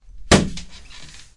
Hitting a desk and getting up from a chair
knock, Hitting-desk